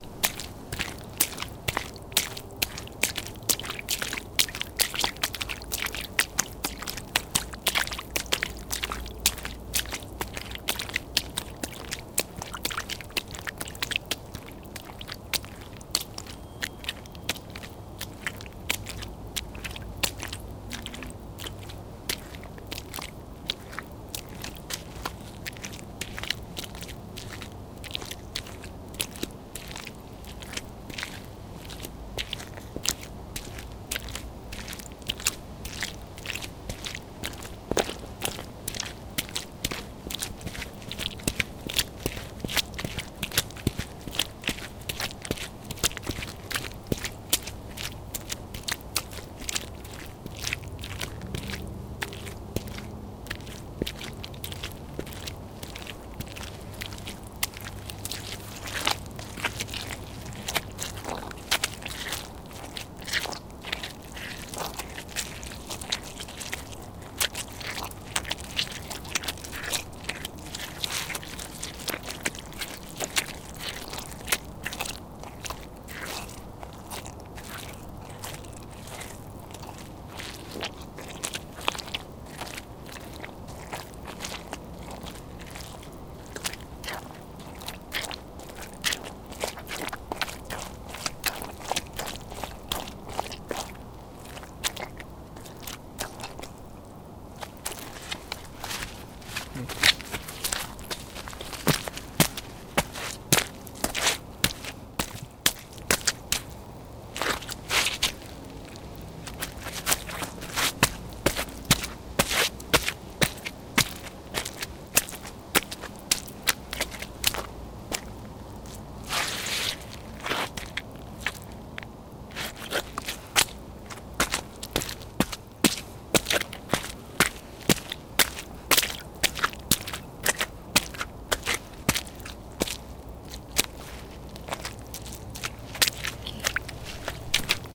Wet Steps on Liquid and Dirt
dirt, feet, foley, foot, foots, footstep, footsteps, liquid, run, running, step, steps, walk, walking, water, wet